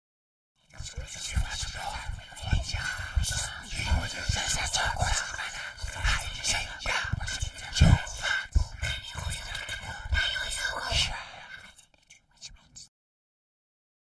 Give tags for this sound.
alien blowing fantasy human noise noisy vocal voice whispers

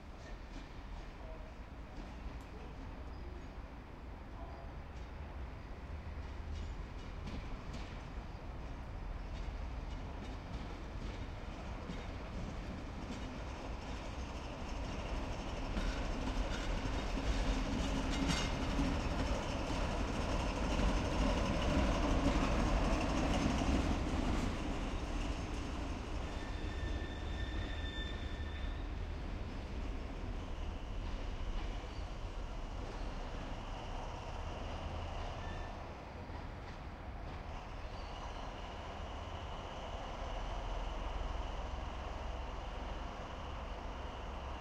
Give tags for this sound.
diesel locomotive maneuver rail rijeka station

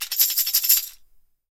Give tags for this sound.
percussive drums drum orchestral percussion hand Tambourine rhythm chime sticks tambour chimes

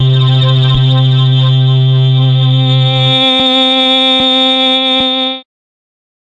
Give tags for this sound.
glitch-hop porn-core hardcore resonance processed electronic 110 effect sound club bounce rave pad acid sci-fi electro synthesizer atmospheric bpm trance dance noise dark synth techno house glitch

